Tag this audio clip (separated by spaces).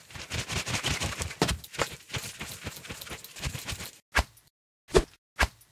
cartoon foley jump kick kung-fu